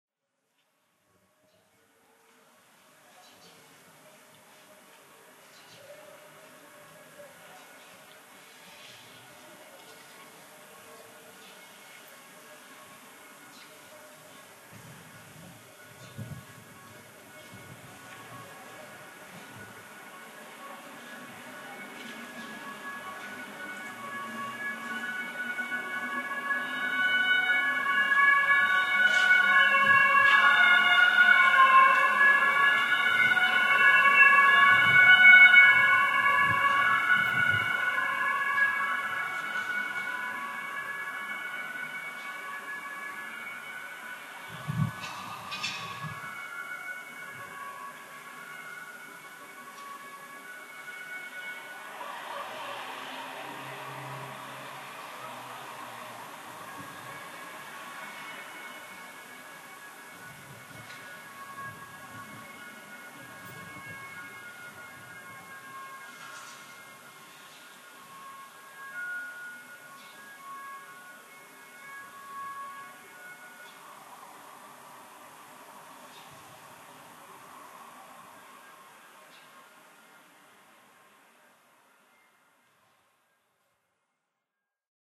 long gone siren
From the balcony of my apartment, you always hear the sounds of the crime and chaos of the city, here are a few sirens sweeping away in the night. A Sony stereo microphone connected to a iriver 799. Processed in DAW to remove some white noise.
urban, long-sweep, siren, city